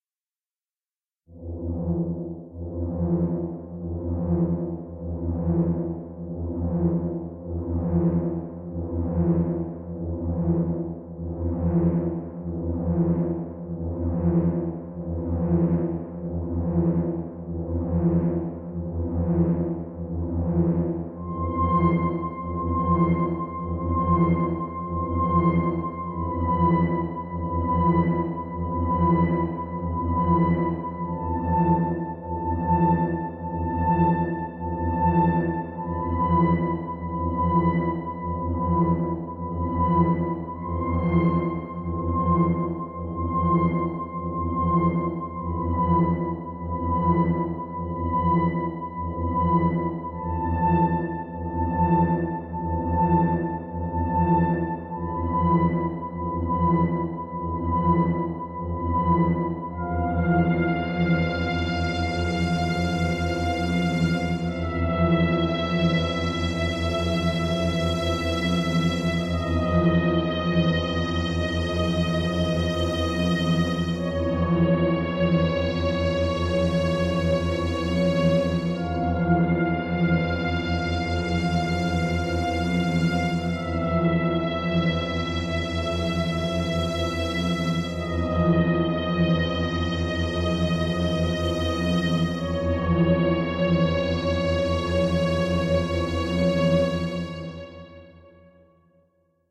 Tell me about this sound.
Unsafeness - retro 80s ( Suspence type)
darkness, retro, 80s, Unsafeness, dark, suspence